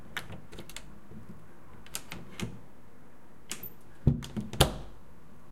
door-open-close01
Sound of a wooden door being opened and closed. Recorded with a Zoom H4n portable recorder.
close, closing, door, opening, wood, wooden, wooden-door